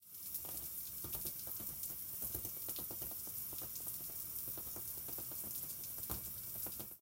foley rain sound